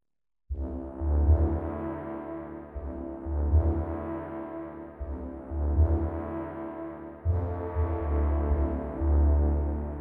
Horribly distorted horn sound
horn, processed, gloomy